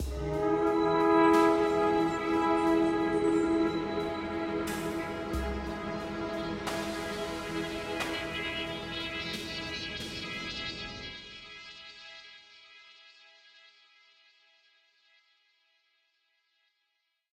As an internship at the Utrecht School of the Arts, Adaptive Sound and Music for Games was investigated. For the use of adaptable non-linear music for games a toolkit was developed to administrate metadata of audio-fragments. In this metadata information was stored regarding some states (for example 'suspense', or 'relaxed' etc.) and possible successors.
The exit-time (go to next audio-file) is at 10666 ms